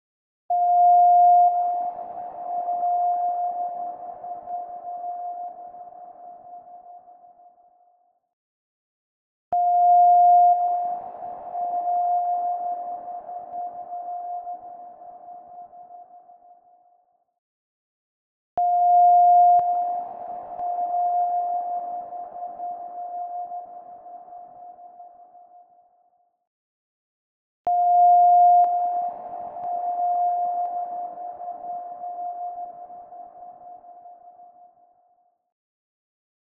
Sonar (tuned to F)
1khz tone edited with audacity with a big amount of echo and reverb to sound like a sonar from a submarine.
1000hz, 1kHz, beep, echo, electronic, F-Tuned, ping, reverb, reverberant, reverberating, signal, sonar, submarine, underwater